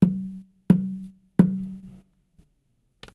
Wood tap echo recording live sample with finger strike
hit percussion drum echo live-sample rhythm percussive percs drum wooden-drum wood wooden-hit